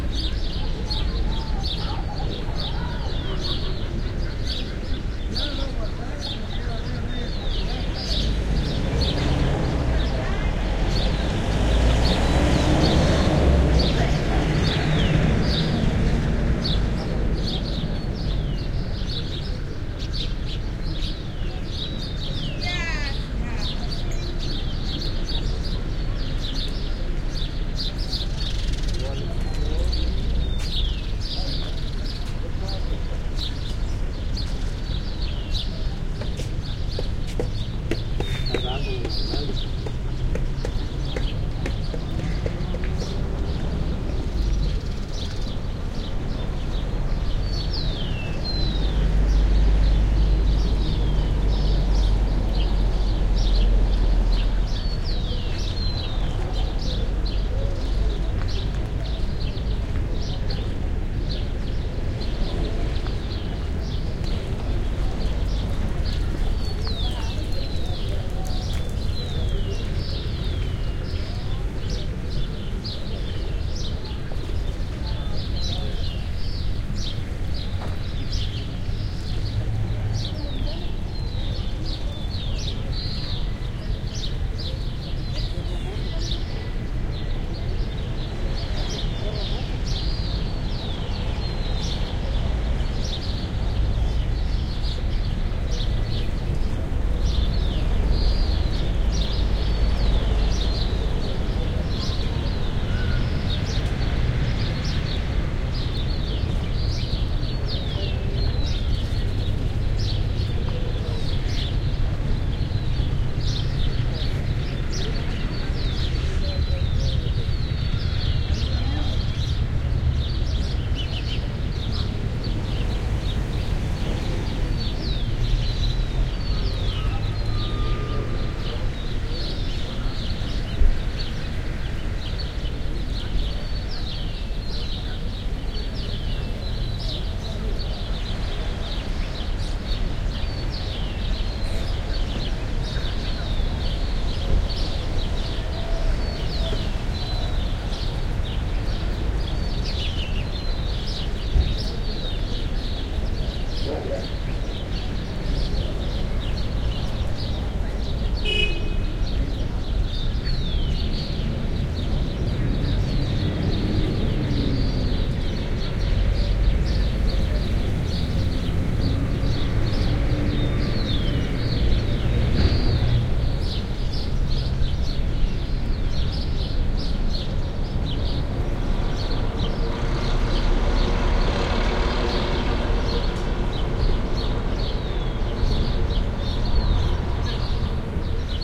Maria Elena plaza at noon
Ambient recording in the central plaza of Maria Elena, Antofagasta, Chile. Lots of birds chirping, some traffic in the background, some locals talking.
Recorded on a MixPre6 with LOM Uši Pro microphones.
traffic, chirping, cars, trucks, birds, ambience, people